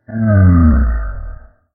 Alien Cow 1
The large bovine slowly wanders past the intergalactic hero while it chews cud with all six of it's mouths. If this describes your sound needs you've found the perfect sound.
alien, animal, ethereal, future, futuristic, grunt, moo, noise, sci-fi, science-fiction, space, strange